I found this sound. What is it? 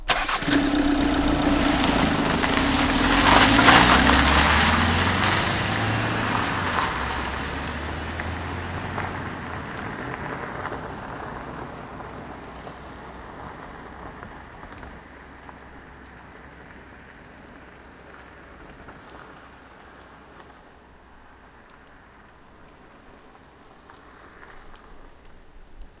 Diesel engine is started and after that the car throttles away.
car, diesel, engine, gravel, start, throttle